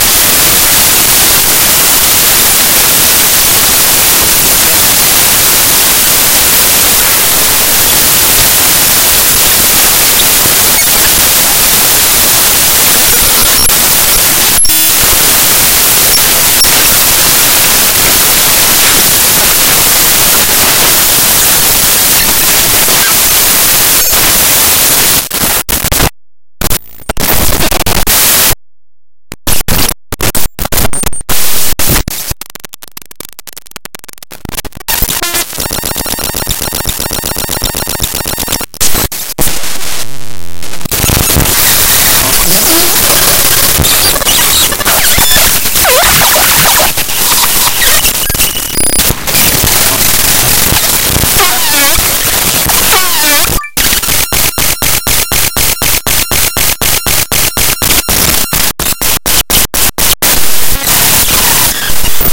Converted the iTunes installation file to audio.
raw, computer, data, noise